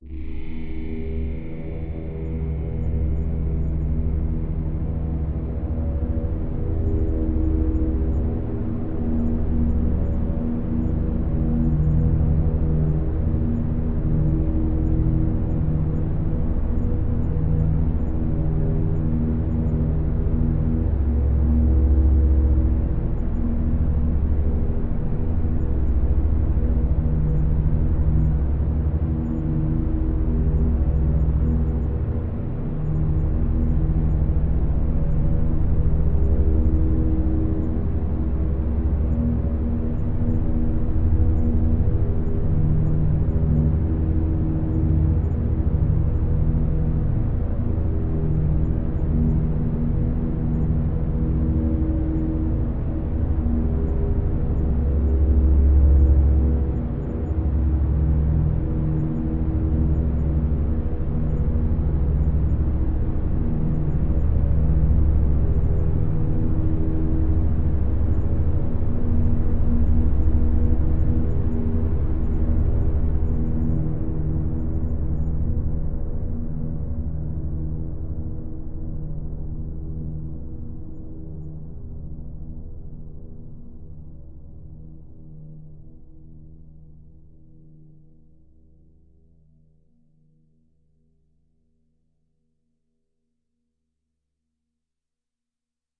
LAYERS 015 - CHOROID PADDO- (21)
LAYERS 015 - CHOROID PADDO is an extensive multisample package containing 128 samples. The numbers are equivalent to chromatic key assignment covering a complete MIDI keyboard (128 keys). The sound of CHOROID PADDO is one of a beautiful PAD. Each sample is more than minute long and is very useful as a nice PAD sound. All samples have a very long sustain phase so no looping is necessary in your favourite samples. It was created layering various VST instruments: Ironhead-Bash, Sontarium, Vember Audio's Surge, Waldorf A1 plus some convolution (Voxengo's Pristine Space is my favourite).
ambient, drone, multisample, pad